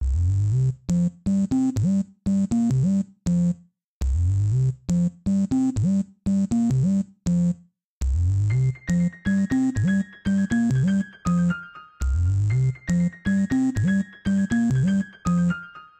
dramatic and minimalist theme with psychadelic salsa piano and some kind of sub dub bass
You can use the full version, just a piece of it or mix it up with 8 bar loopable chunks.
phantom, sub, drama, bass, loop, music, dramatic, danger, theme, piano, salsa, retro, promise, psychadelic, pact, circus, synth, dub, video, game, loopable, electro